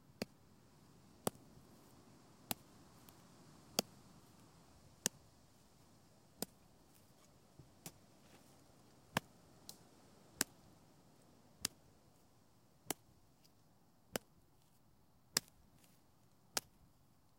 Stick into soft dirt
Stick hitting dirt. To be used to simulate bullets hitting ground